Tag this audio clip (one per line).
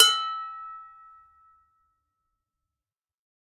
sounds
playing
natural
stomps
various
egoless
rhytm
pot